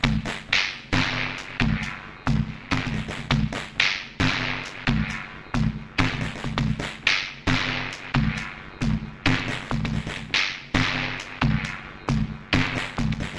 dub drums 002
pitch down glitch sounds